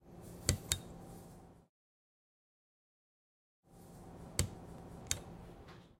button press
Press of an button in a czech tram.
train, tram, czechtram